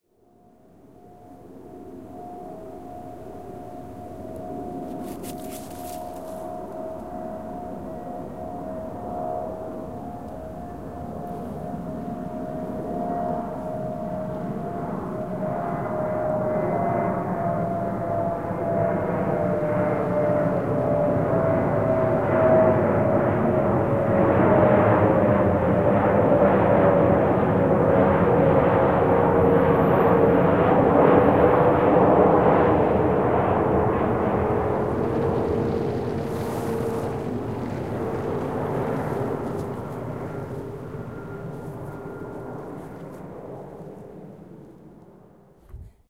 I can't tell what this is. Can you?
Plane on descent passing over
A medium sized airplane wen right over me with my Zoom handy. Couple unwanted noises by my dog on the leash and a car nearby.
airplane, descent, plane, fly-by